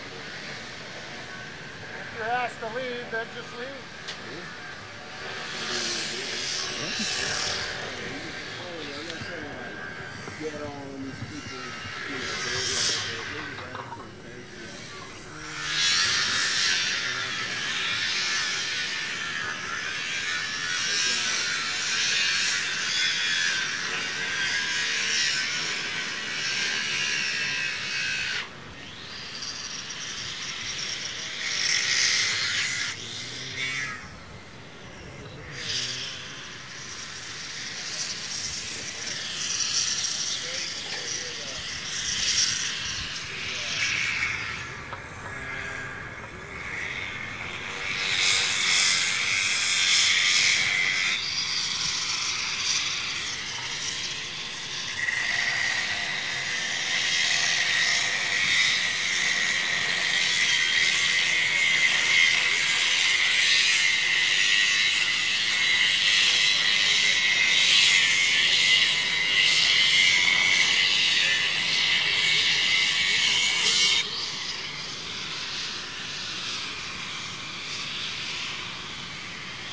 Sneaking into the boatyard